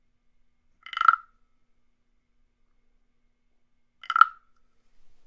Small Frog
Part of a pack of assorted world percussion sounds, for use in sampling or perhaps sound design punctuations for an animation
animation, fx, hit, hits, percussion, sfx, silly, world